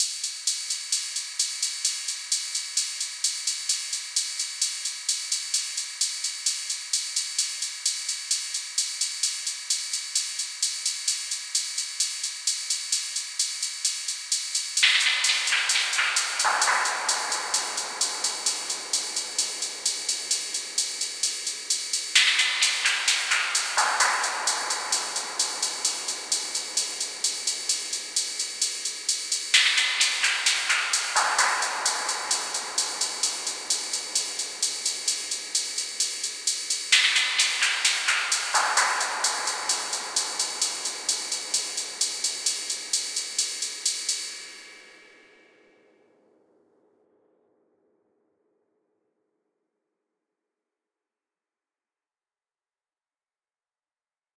strange music (percussion only)
The percussion tracks of the "strange music" upload as requested.